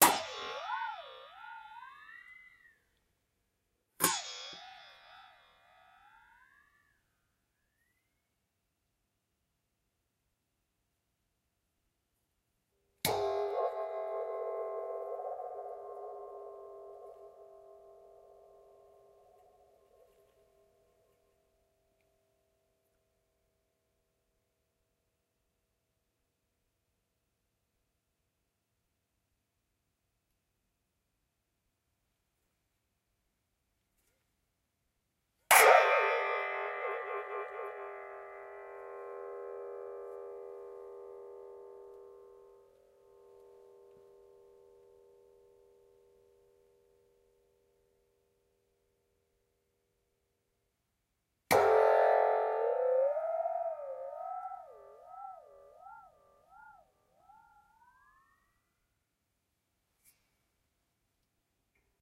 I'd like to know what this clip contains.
Two Man Saw - Single Hits 1
1.5 meter long crosscut two-man saw with wooden handles being hit at different strength levels, various hit tail alterations and manipulations as the body of the saw is being bent or shaken. Occasional disturbance in the left channel due to unexpected recording equipment issues.
blade, metal, saw, two-man-saw